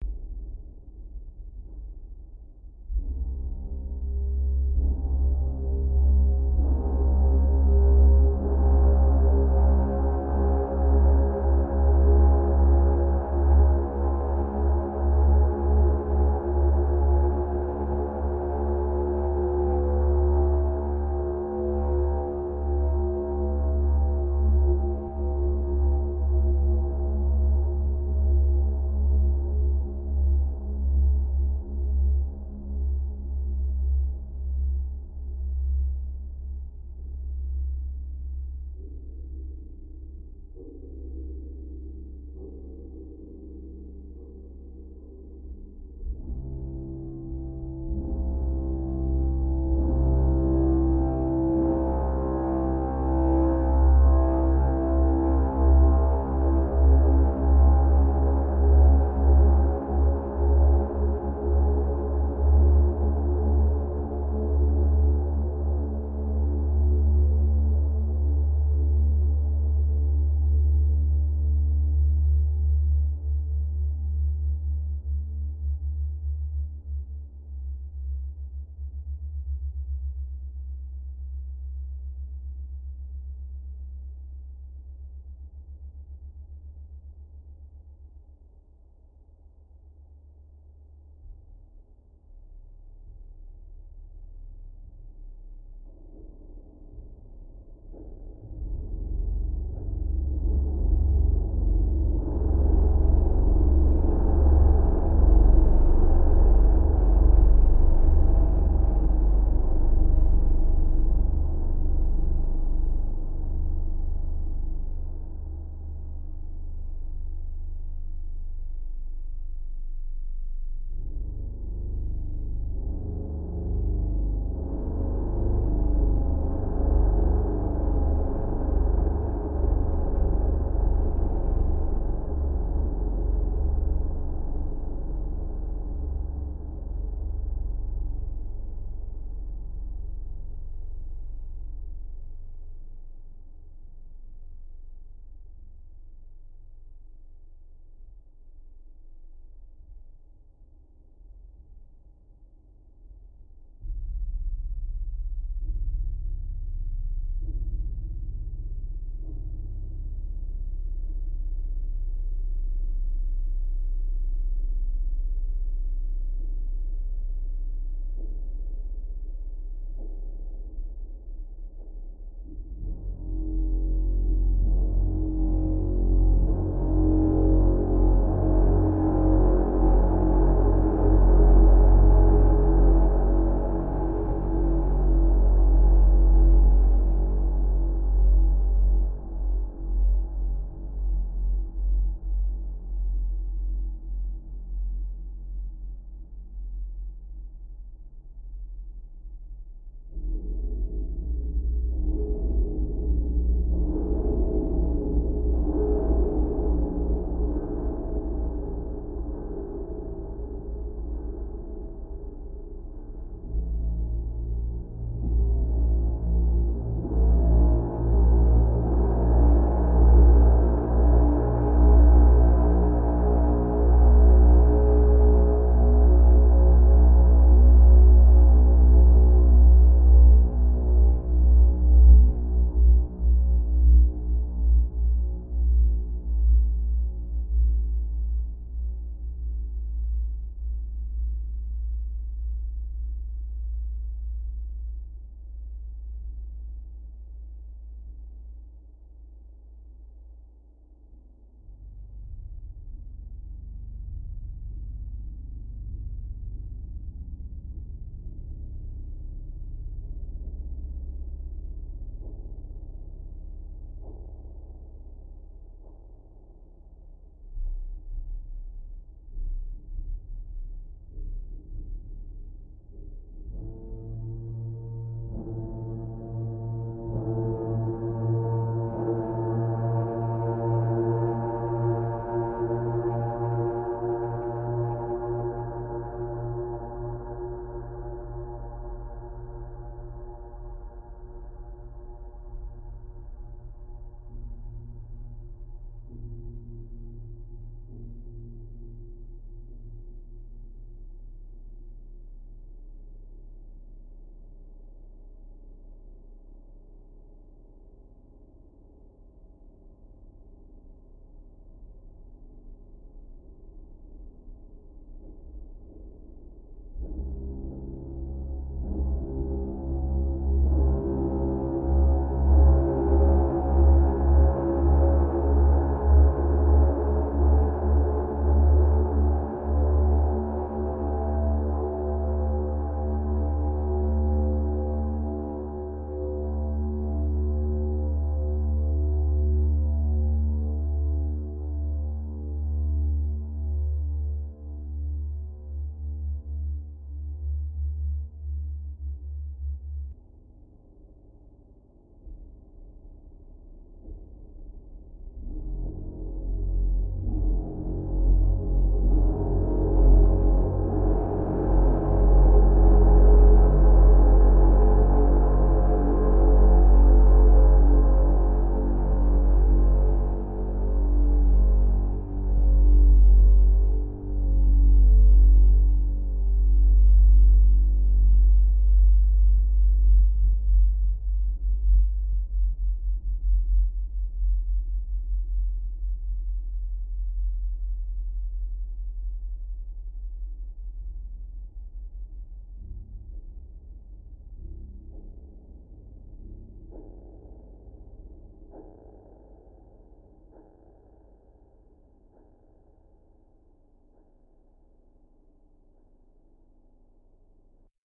A deep bass drone made with an guitar and some effects.
Gives a creepy feeling. Enjoy.
Recording, Movie, Ambient, SFX, Deep, Horror, Effect, Aliens, Pitching, Cinematic, Bass, Hum, FX, Drone, Creepy, Sci-fi